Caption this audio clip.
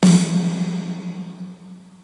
Power Snare 2
A series of mighty, heavy snare hits. Works good with many electronic music subgenres.